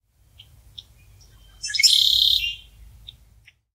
Red-Winged Blackbird 03202020
Call of a Red-Winged Blackbird I recorded with my cell phone and processed with Audacity. This was recorded in Ferndale, WA USA during my daily walk.
Bird, Blackbird, Field-Recording, Red-Winged